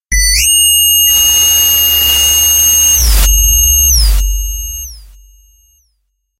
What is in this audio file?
hardcore siren 1
A simple siren effect.
alarm, dub, effect, fx, reverb, scifi, space, synthedit, synthesized